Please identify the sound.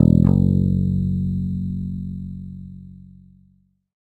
First octave note.
multisample, guitar, electric, bass